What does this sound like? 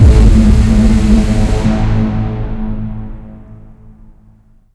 My take on the inception sound.